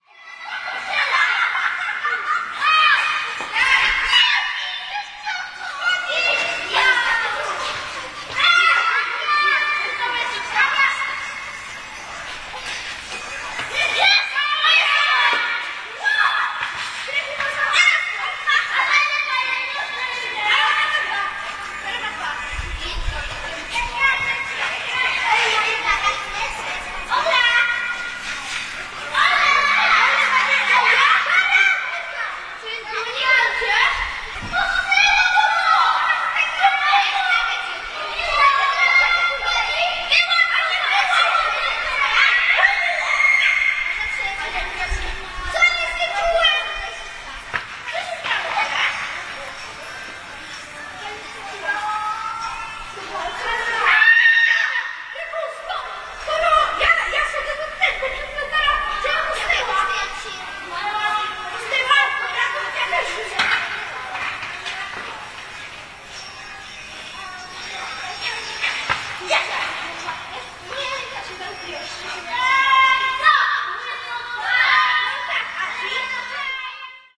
children courtyard 210510
21.05.2010: the children's sounds during their play. The sound recorded from my balcony faces the courtyard. These children plays everyday, all day. They play football, hide and seek, they dance, sing and so on. This is the most noisy place where I have ever lived. Gorna Wilda street in Poznan
more on: